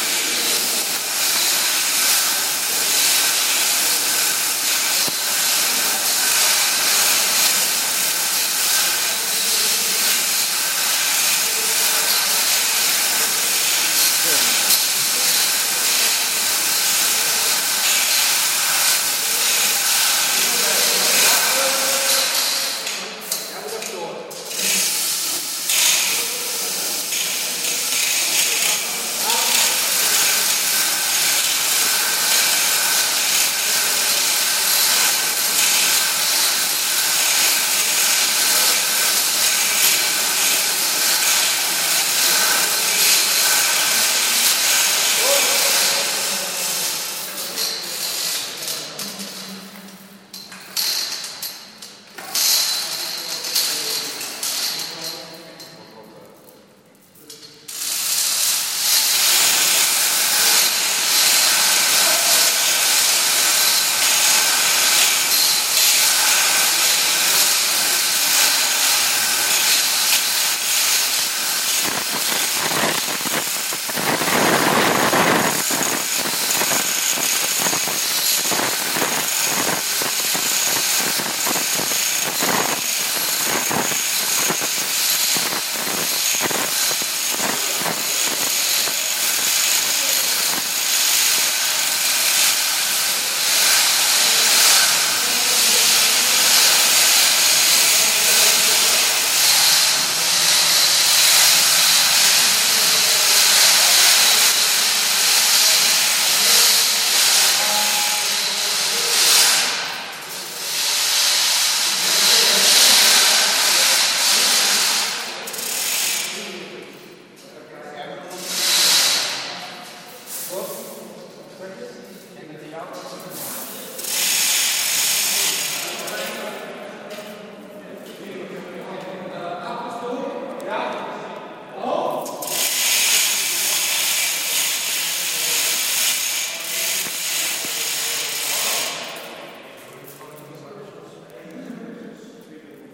Lifting a truss by pulling down heavy chains in a factory hall.
During the build up of an audio/video festival four people were pulling down heavy chains to lift a truss about 15 meters high, for the use of four beamers projecting down to the floor. Recorded in mono with iPhone 4 (Blue Fire app).
chain, chains, church, construction, factory, hall, heavy, hoist, iron, lift, lifting, lighting, metal, metallic, move, noise, pull, pulley, rise, rising, rope, stage, truss, venue